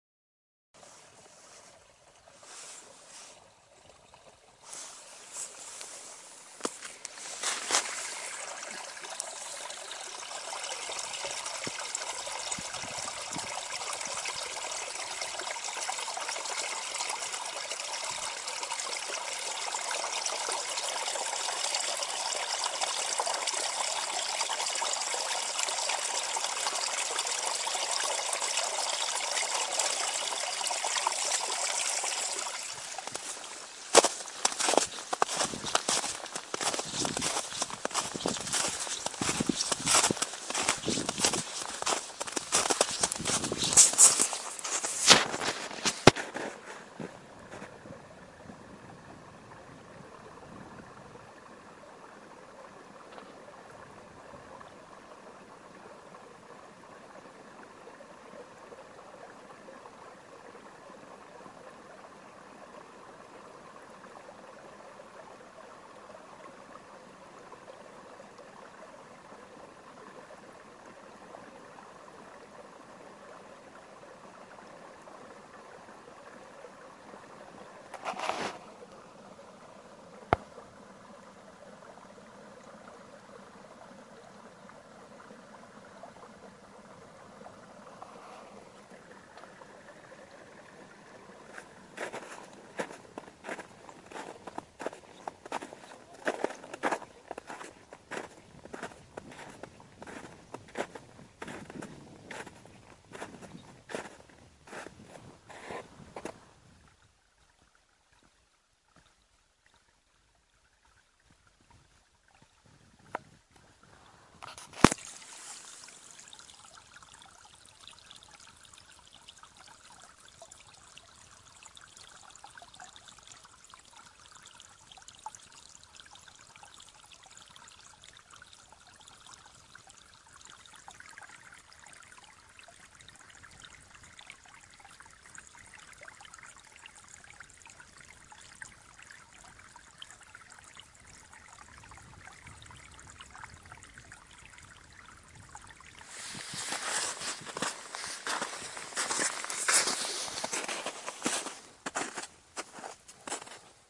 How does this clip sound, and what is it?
spring-water-stream-snow-walking
A walk on snow recording the streams of water appearing from the melting snow in spring. Recorded with my Samsung cellphone in the woods of Mid - Norway in April 2015.
creek, field-recording, melting, nature, snow, spring, stream, walking-in-snow, water